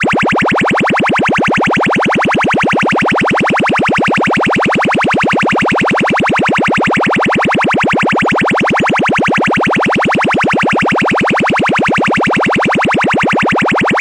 cartoon-like siren recreated on a Roland System100 vintage modular synth